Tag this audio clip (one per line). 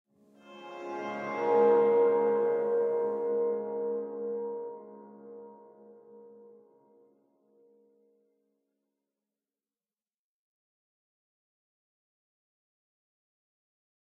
bow
spacey
electric
bowed
reverb
huge
guitar
chord
soundscape
violin
string
orchestral